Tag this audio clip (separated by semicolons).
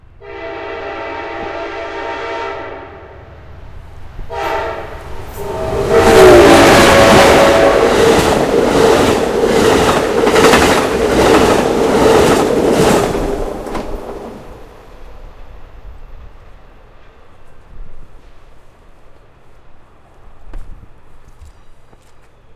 freight; locomotive; railroad; train